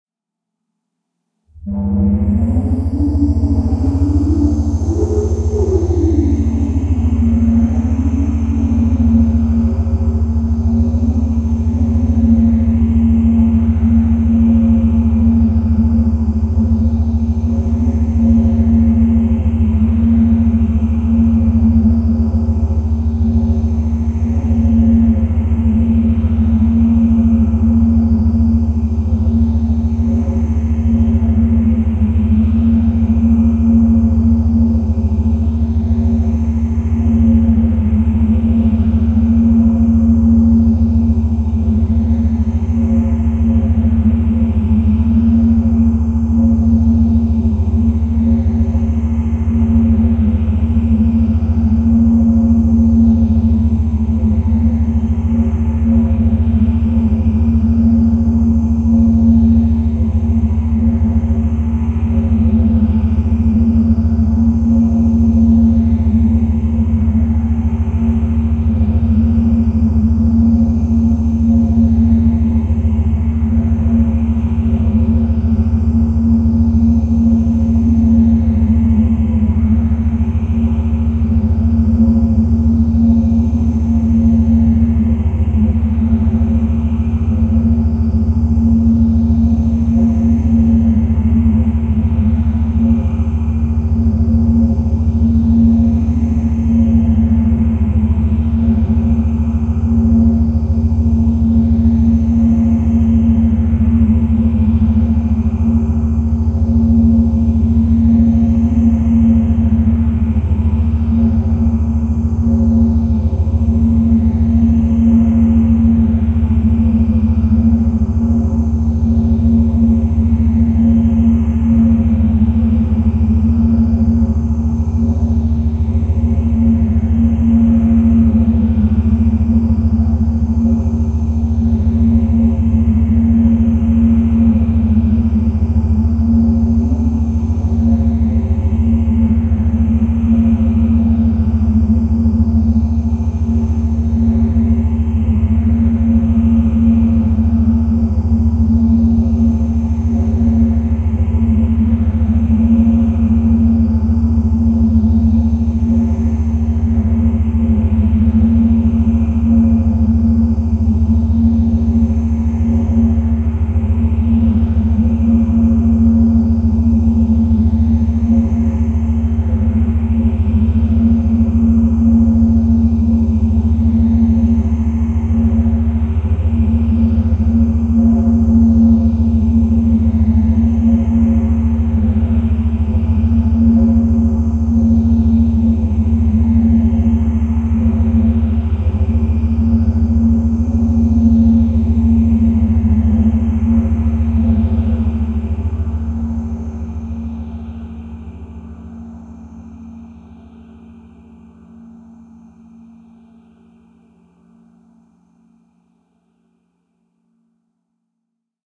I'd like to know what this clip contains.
LAYERS 017 - MOTORCYCLE DOOM 2-117
LAYERS 017 - MOTORCYCLE DOOM 2 builds further on LAYERS 017 - MOTORCYCLE DOOM. It is this sound mixed with a self created pad sound from the Discovery Pro VST synth with a Detroit like sound but this sound is processed quite heavily afterwards: first mutilation is done with NI Spectral Delay, then some reverb was added (Nomad Blue Verb), and finally some deformation processing was applied form Quad Frohmage. To Spice everything even further some convolution from REVerence was added. The result is a heavy lightly distorted pad sound with a drone like background. Sampled on every key of the keyboard and over 3 minutes long for each sample, so no looping is needed. Please note that the sample numbering for this package starts at number 2 and goes on till 129.
multisample, evolving, drone, experimental, artificial, soundscape